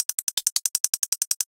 ticks running through the stereospectrum
funny, sweet, drums, silly